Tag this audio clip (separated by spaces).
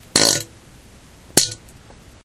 explosion weird fart gas flatulation noise flatulence poot